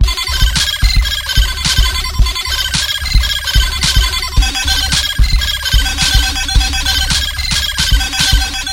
8bit110bpm-35
The 8 Bit Gamer collection is a fun chip tune like collection of computer generated sound organized into loops
8, bit, com, 110, loop, 8bit, bpm